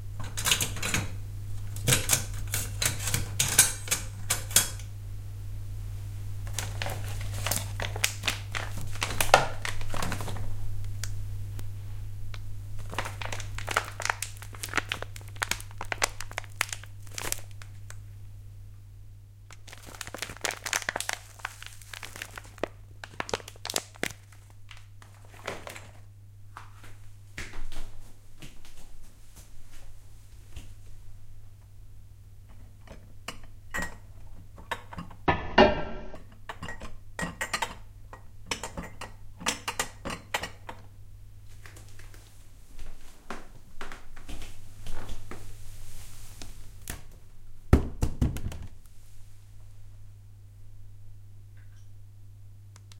Kitchen Sounds [RAW]
This is the sound of me playing with things in the kitchen metal spoons and kitchen utilities.
drawer, mugs, glass, sounds, cups, bag, metal, spoons, jar, plastic, kitchen